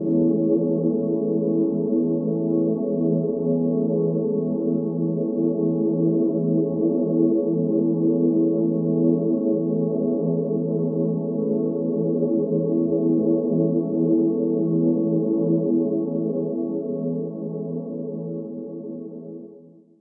A bell tone generated in CoolEdit. Filtered out high-end and reduced noise.